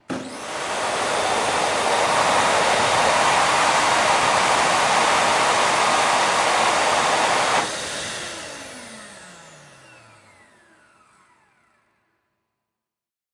Hand Dryer 1 (no hand movement)
Recording of a Hand-dryer. Recorded with a Zoom H5. Part of a pack
Dryer, Bathroom, Vacuum, Hand